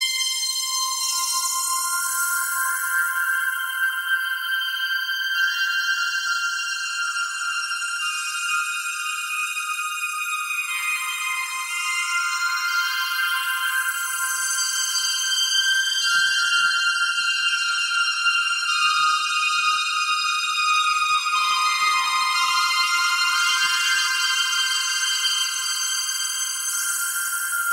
80's Strings in Serum.